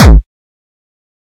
Distorted kick created with F.L. Studio. Blood Overdrive, Parametric EQ, Stereo enhancer, and EQUO effects were used.
progression, hardcore, bass, beat, trance, synth, drumloop, kick, kickdrum, drum, distortion, techno, melody, hard